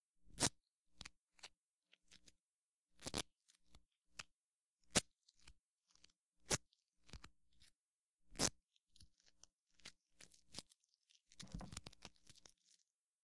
tape03-duct tape#2
Duct tape (silver, 2") being stretched and then tearing it such that the fabric is distinguishable.
All samples in this set were recorded on a hollow, injection-molded, plastic table, which periodically adds a hollow thump if the roll of tape is dropped. Noise reduction applied to remove systemic hum, which leaves some artifacts if amplified greatly. Some samples are normalized to -0.5 dB, while others are not.